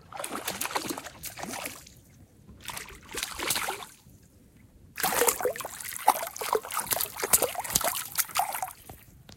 Trout splashing after being caught
Would love to see how you use this sound, please drop a link in the comments!
This is the sound of a trout splashing in the water as it's being reeled in. It's a small trout around 14" so small splashes. It does get near the microphone so it sounds close.
caught, fish, fishing, jumping, lake, splashing, trout, water